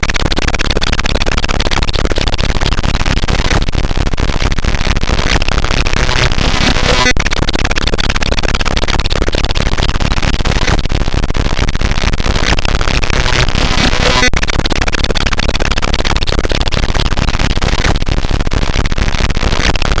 Sound made with glitch machine on iphone get RPN code from iphone/ipod/ipad: